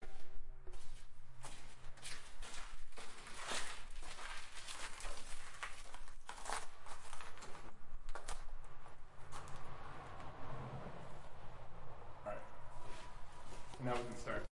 Walking on snow and ice
Me walking on icy snow, also some annoying talking at the end...sorry.
field-recording, footsteps